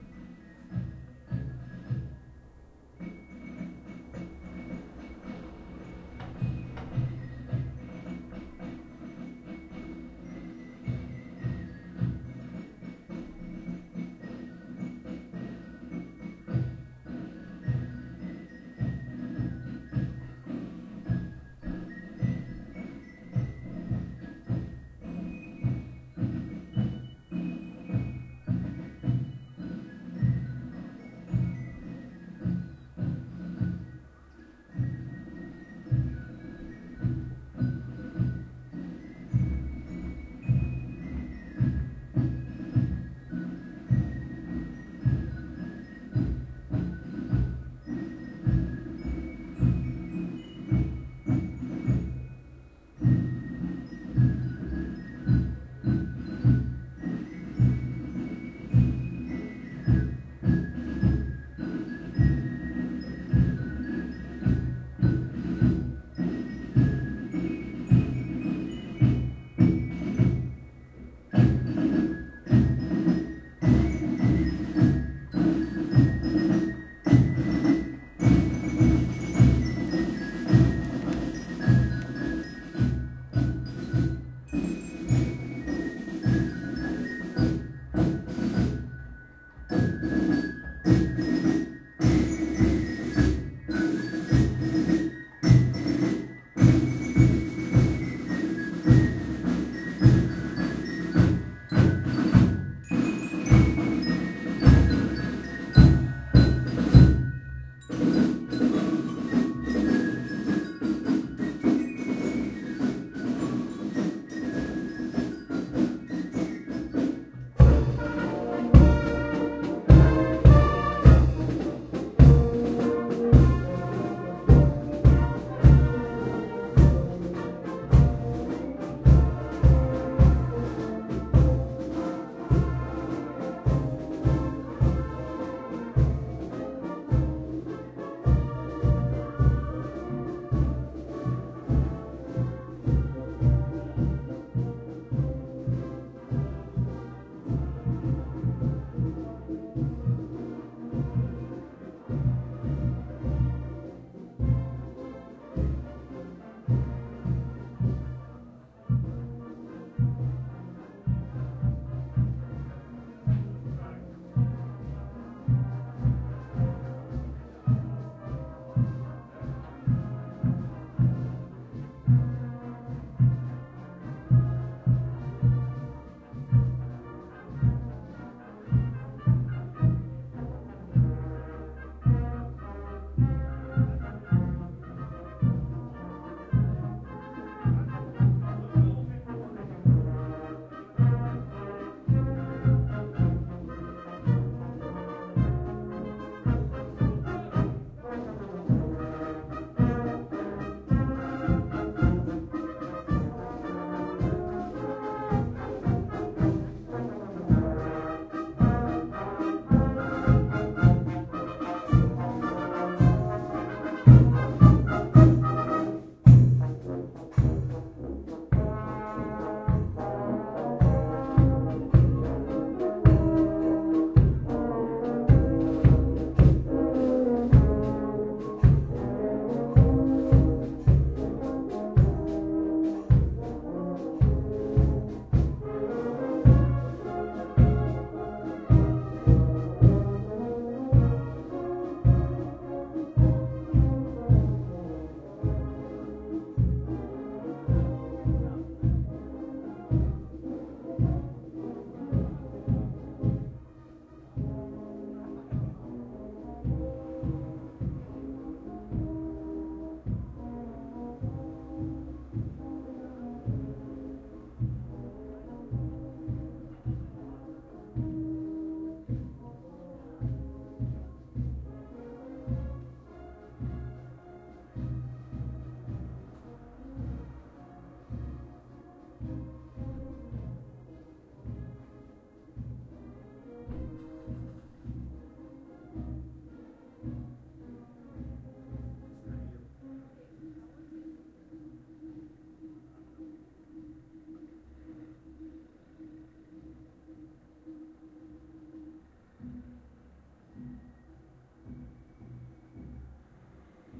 Schuetzenfest Kapelle
tzenfest, tzen, traditionell, German, shooting, Sch, Fest, match, traditional, scoring, deutsch, Blaskapelle, spree